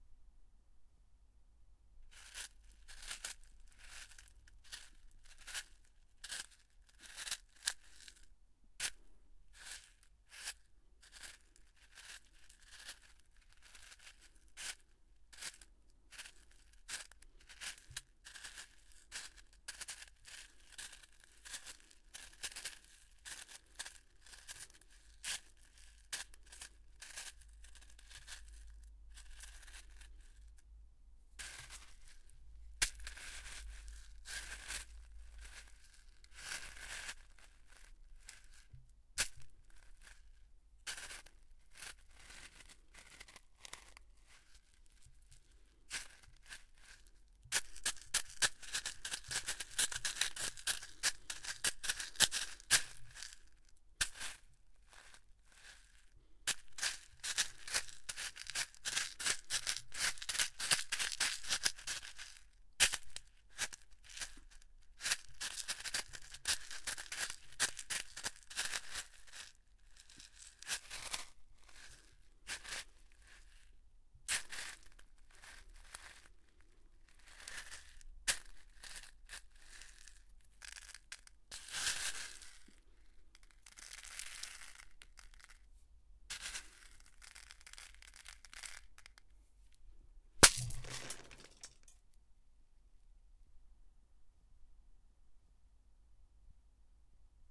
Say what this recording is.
Foley footsteps on gravel/small pebbles for a beach. Walking, running, and sitting gently and hard - originally being used for an audio drama podcast.
This is a completely raw recording, so have kept in 'silence' for you to clean how you prefer, depending if you're doing anything to the pitch.
Recorded on a Se Electronics X1 large condenser mic.
I've benefitted hugely from people's files here, so if this is helpful for anyone, that's brilliant.
crunch, walking, walk, pebble-beach, gravel, beach, footsteps, foley